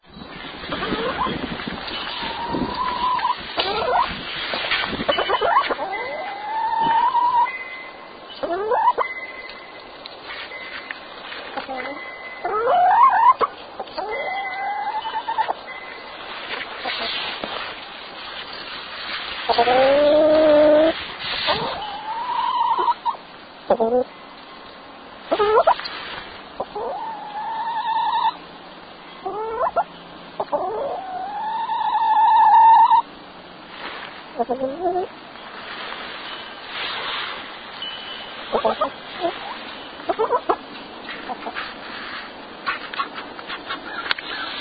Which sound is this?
chicken and wind chimes
A chicken vocalizing on a breezy day with wind chimes.